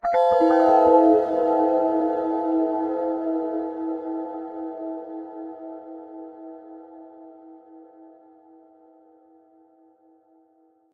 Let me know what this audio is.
Start Sounds | Free Sound Effects